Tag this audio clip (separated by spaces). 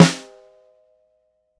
Snare Unlayered Shot